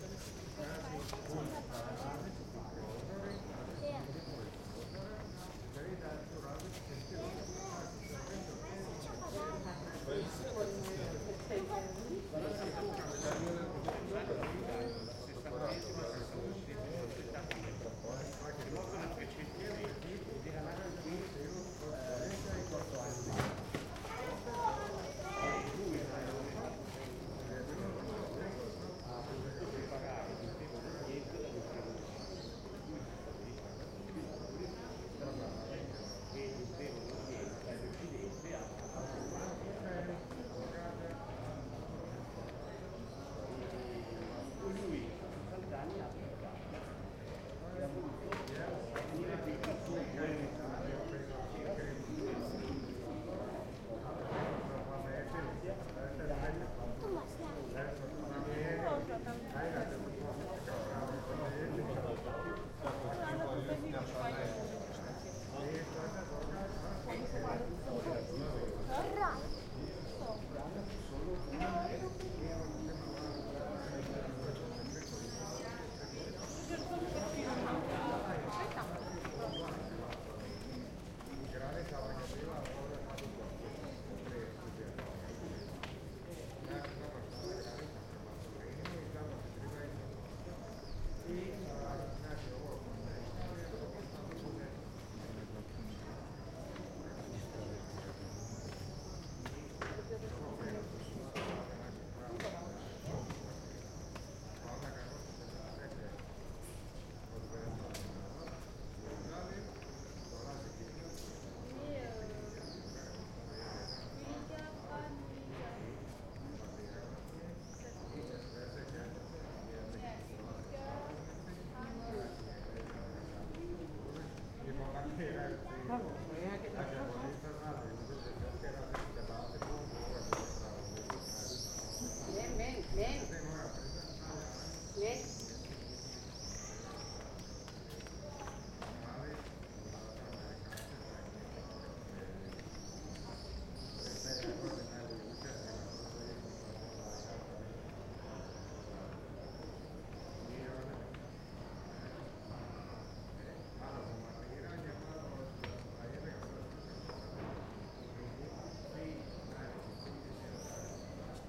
130717 Split CathedralPlaza1 F 4824
Surround recording of a square behind the Cathedral of the city of Split in Croatia.
It is a summer afternoon, flying swallows can be heard and a growp of Italian tourists have just arrived…
Recorded with a Zoom H2.
This file contains the front channels, recorded with a mic-dispersion of 90°